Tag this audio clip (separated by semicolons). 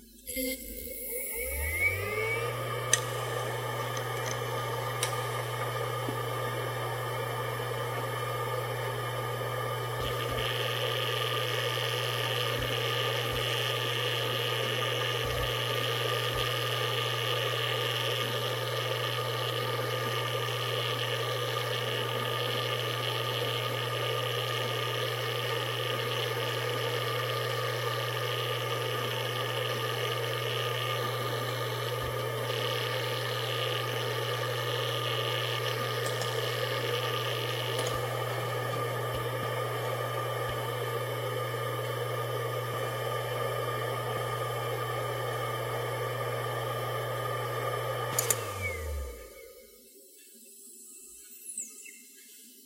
disk; drive; hard; hdd; machine; motor; rattle; seagate